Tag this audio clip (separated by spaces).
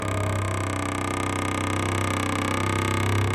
creaky croak door wooden